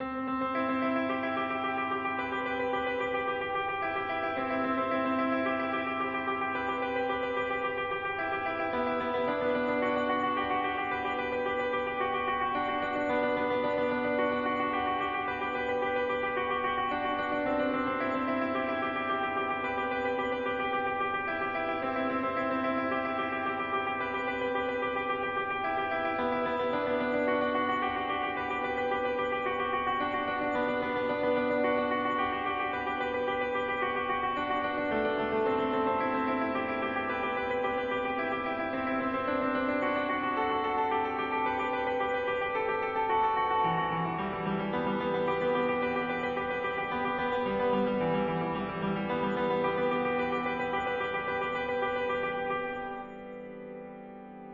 clip from one of my tracks - created in reason using grand piano with a little echo and re-verb
piano, melody